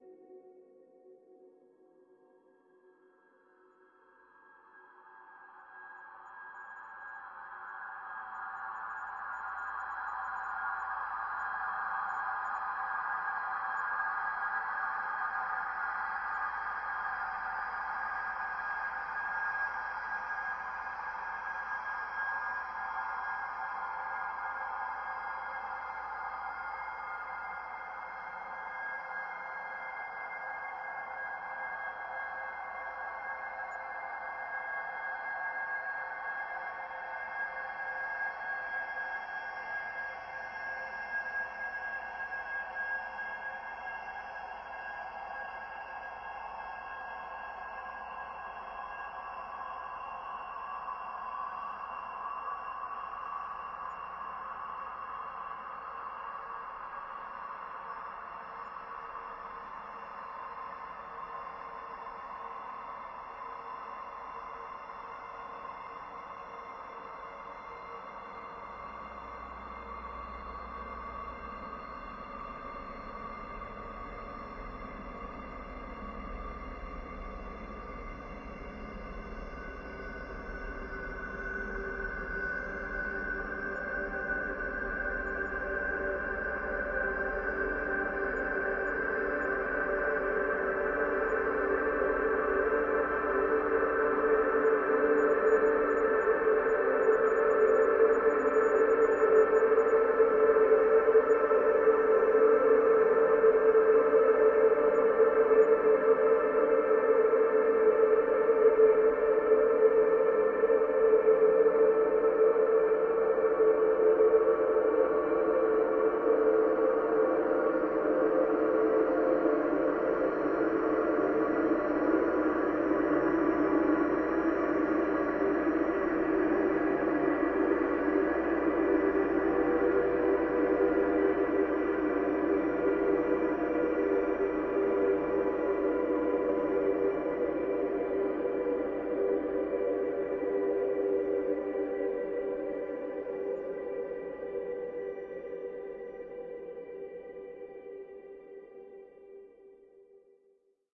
LAYERS 008 - MegaDrone PadScape - G6
LAYERS 008 - MegaDrone PadScape is an extensive multisample package containing 97 samples covering C0 till C8. The key name is included in the sample name. The sound of MegaDrone PadScape is already in the name: a long (over 2 minutes!) slowly evolving ambient drone pad that can be played as a PAD sound in your favourite sampler. It was created using NI Kontakt 3 within Cubase and a lot of convolution (Voxengo's Pristine Space is my favourite) as well as some reverb from u-he: Uhbik-A.
DEDICATED to XAVIER SERRA! HAPPY BIRTHDAY!
evolving, pad, ambient, multisample, soundscape, artificial, drone